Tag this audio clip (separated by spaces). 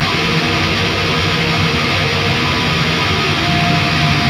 Alien Electronic Machines Noise